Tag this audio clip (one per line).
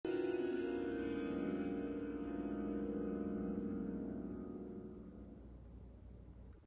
music
instruments
sounds